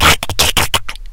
A voice sound effect useful for smaller, mostly evil, creatures in all kind of games.
goblin, sfx, RPG, small-creature, gaming